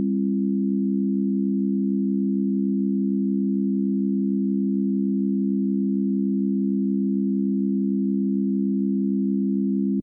test signal chord pythagorean ratio